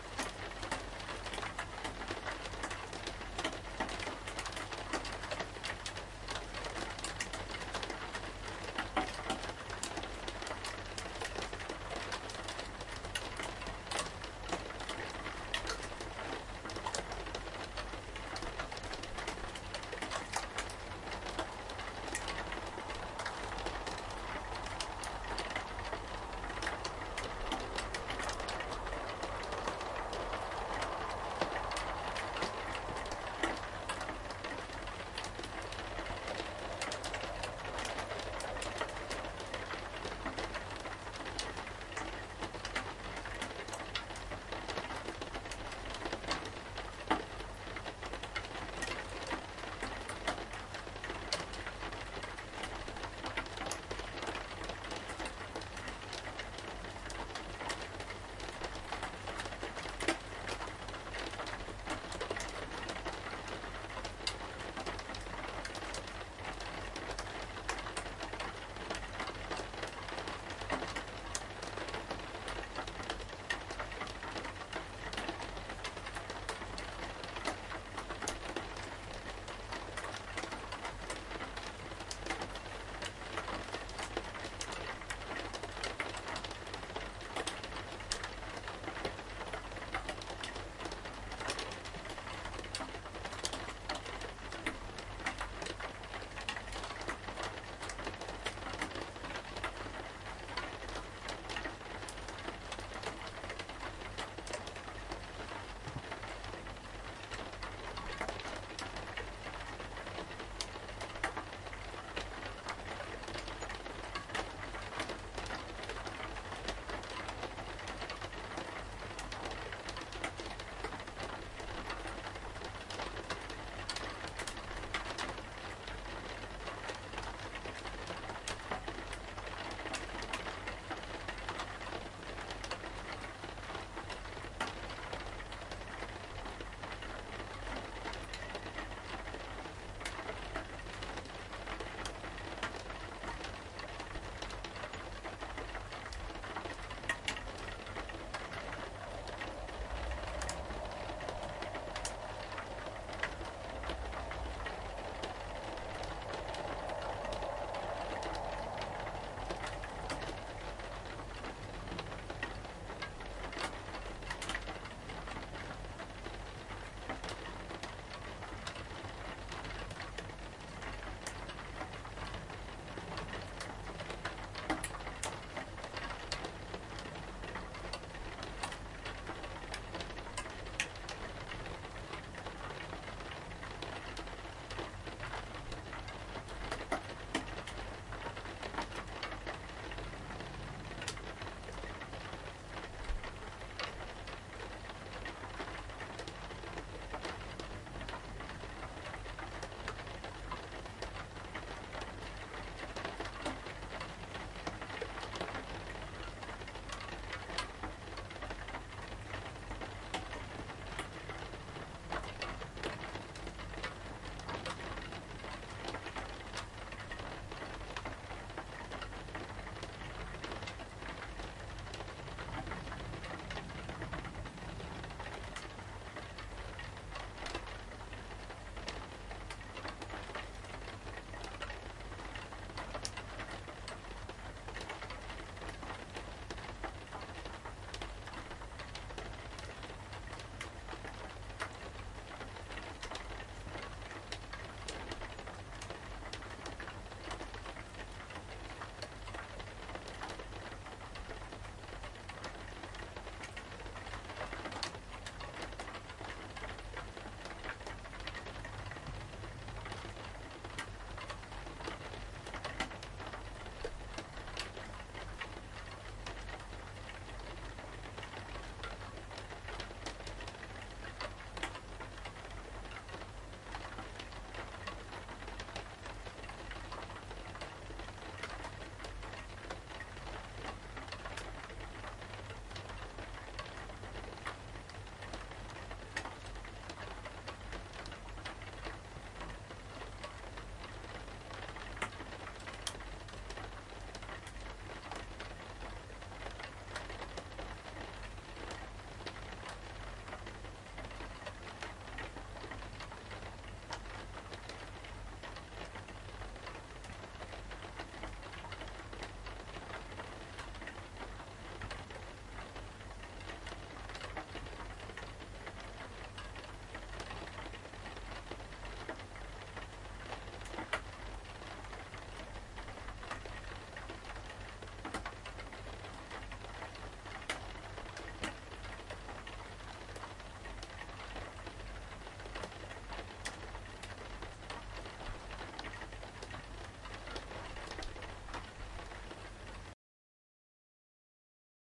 Rain on a window 3
Raining inside on a window.
interior, rain, window